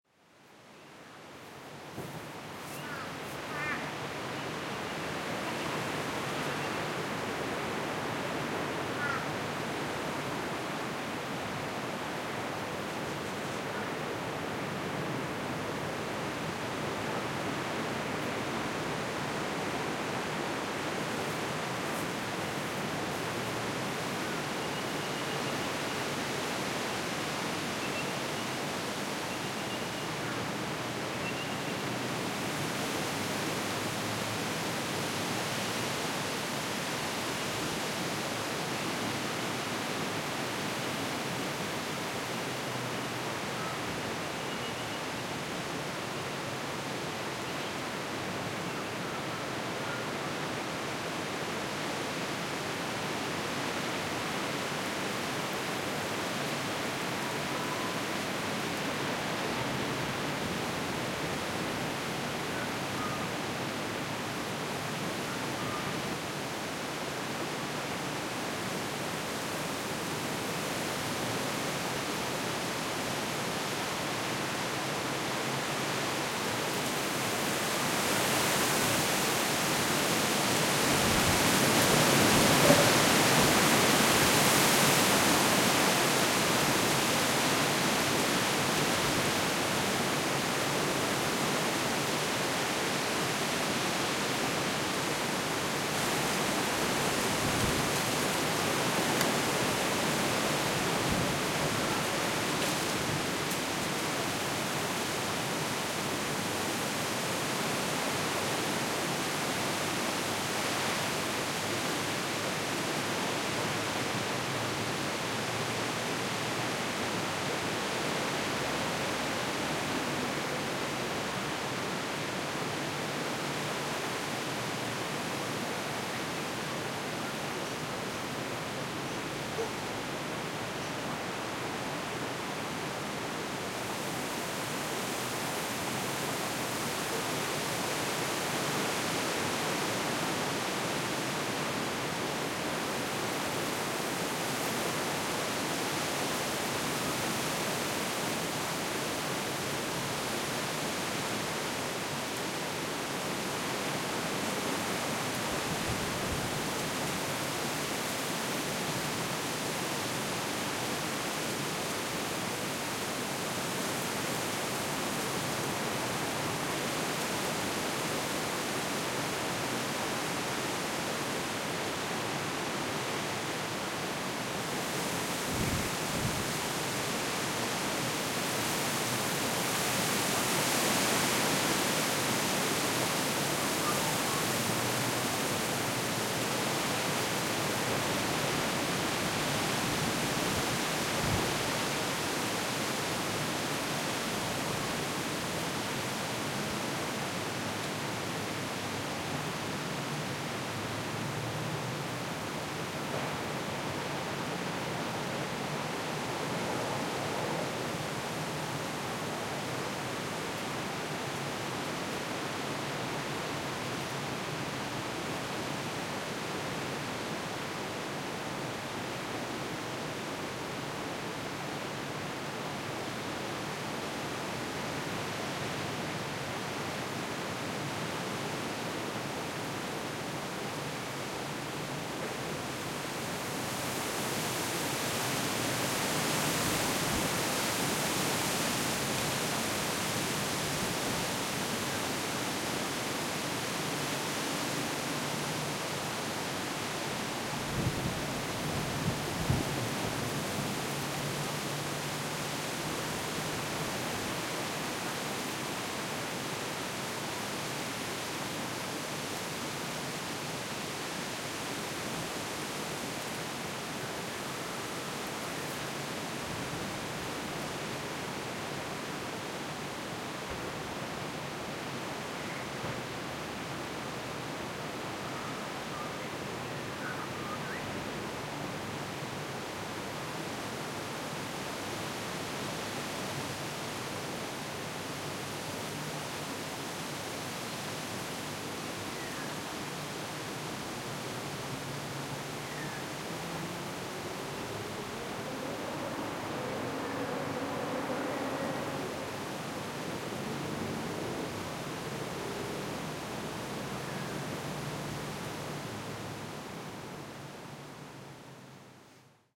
medium heavy wind gusts 2
The sound of birds and trees in strong wind gusts. Recorded using the Zoom H6 MS module.
gale, gusts, trees, windy